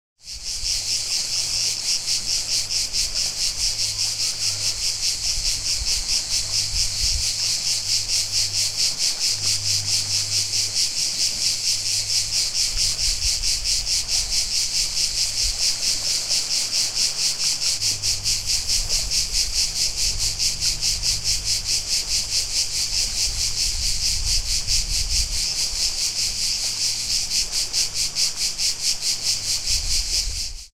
Loud cicadas near the sea shore. Soft waves, some light summer breeze...